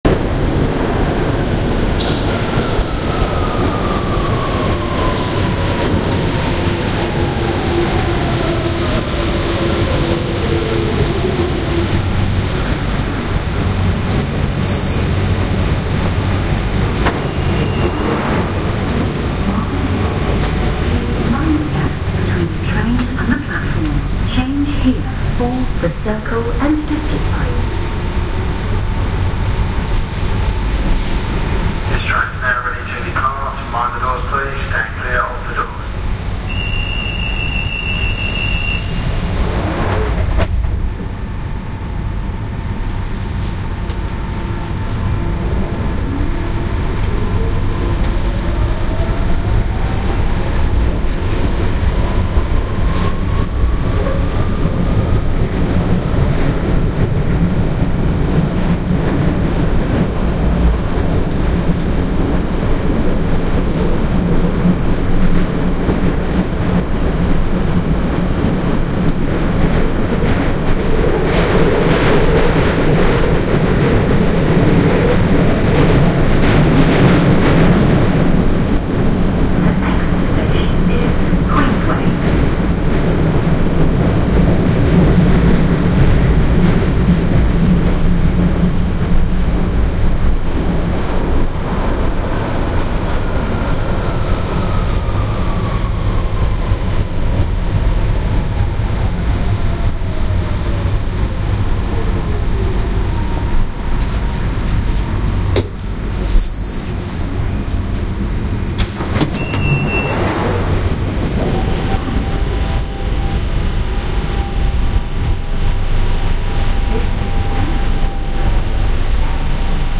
Central Line Ambience
ambiance, ambience, ambient, atmosphere, background-sound, binaural, field-recording, london, soundscape